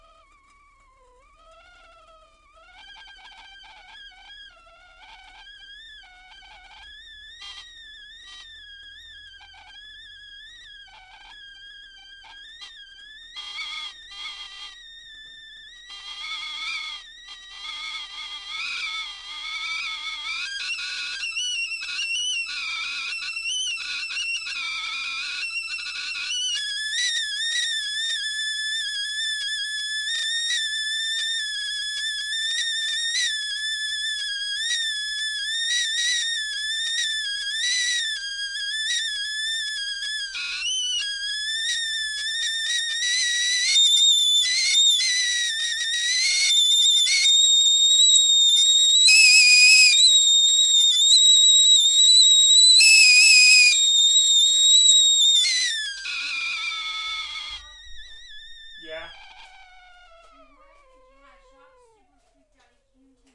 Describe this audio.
kettle long
Needed a tea kettle sound, so I recorded this. From a hot near-boil to full whistle, and turned off. Apologies for my voice at the end, responding to my girlfriend yelling at me for boiling water multiple times at 11:00 PM.
Recorded with AT2020 USB directly to Audacity on my Macbook. Noise removed (I think)
tea, stove, kettle, bubbling, steam